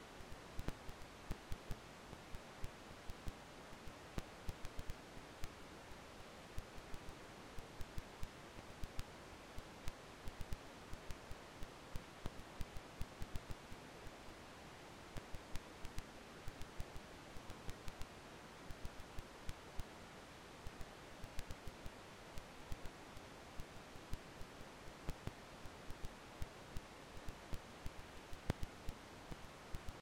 1970 45 rpm record crackle (low wear
A record crackle I built in Audacity. The year and rpm are in the file name.
rpm, hiss, wear, warp, crackle, vinyl, record